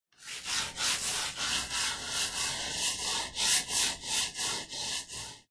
made with jewelry on a table
scratch, itchy, slide